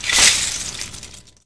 LEGO Pour 1
Pouring out a bag of LEGO bricks on a table
LEGO, bag, click, drop, fall, plastic, pour, toy